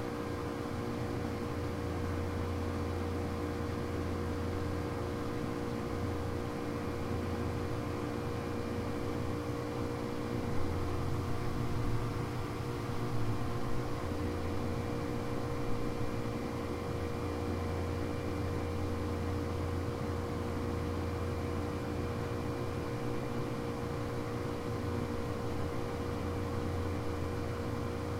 Compressors at cooling plant.